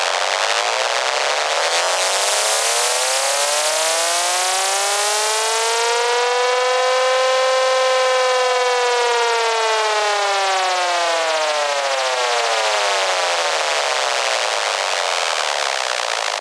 Nord Modular G2 through UAD Plug ins
fm, lead, nord, uad